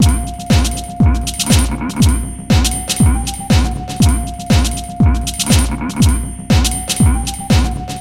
120bpm Loop P106
Processed acid-loop 120 bpm with drums and human voice